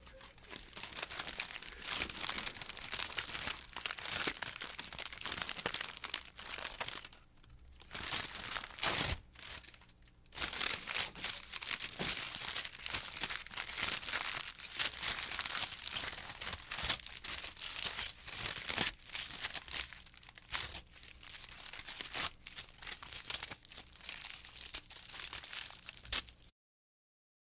rasguñando papel metal
audio-technica, cali, diseo-medios-interactivos, dmi, estudio, metalromper, papel, rasgar, rasguar